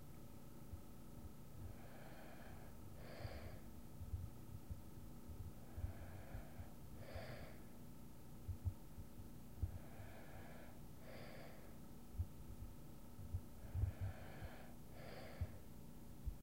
Recording of my roommate sleeping during the night. There is a background hum.
snoring, night